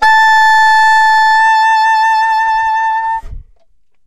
The second sample in the series. The format is ready to use in sampletank but obviously can be imported to other samplers. This sax is slightly smoother and warmer than the previous one. The collection includes multiple articulations for a realistic performance.